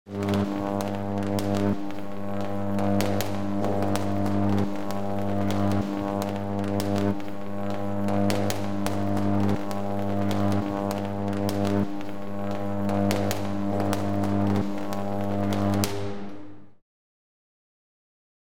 Jacob's ladder 2
electric, electricity